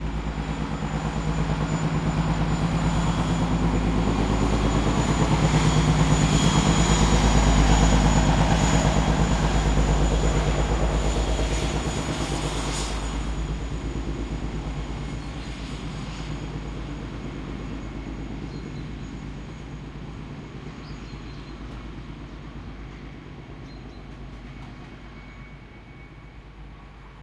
Diesel train 01
A diesel locomotive pulls a short freight train
rail-way, train, railroad, locomotive, rail, rail-road, freight-train